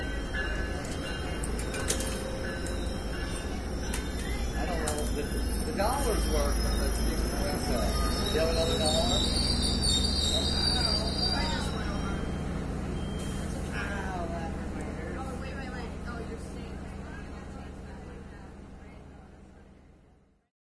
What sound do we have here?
a ticket station6
This is the remaining file of a ticket station.This recording was taken at Mangonia park, as a train arrived.
train-bell, speech, human